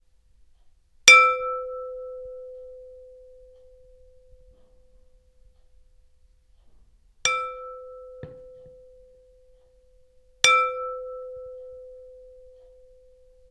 glass sounds long
a simple glass sound from a top of a pan, high an clear
top, kitchen, pan, glass